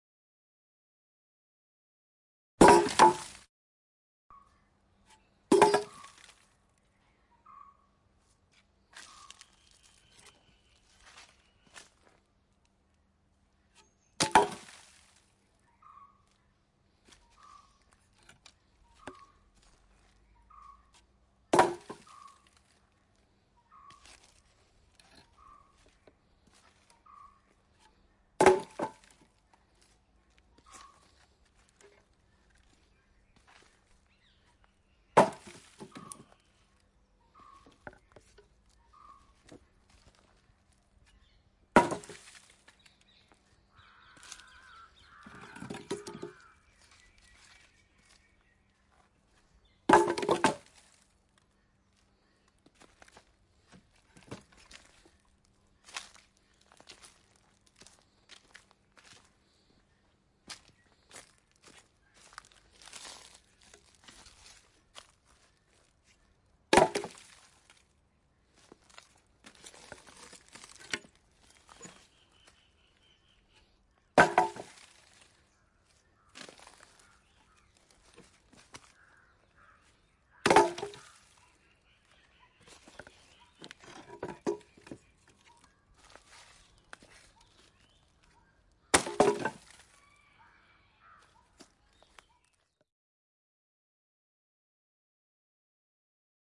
Stacking wood
All the best.
Dharmendra Chakrasali